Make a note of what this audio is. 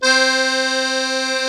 real master accordeon